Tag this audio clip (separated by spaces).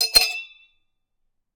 Metalic hit tink ding dong bottle metal drink